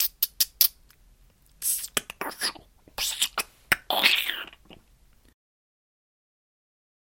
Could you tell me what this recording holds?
SquelchyEwok1 4b 135bpm
Beatbox creative sounds / loop
4 bar @ 135bpm
beatbox, creative, dare-19, loop